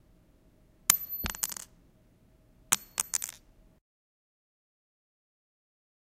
A pin drops.
drop, pin